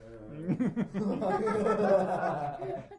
Small group of people laughing 3
Small group of people laughing rather evil.
Recorded with zoom h4n.
evil, female, laughs, people, laughing, human, male, laugh, group, chuckle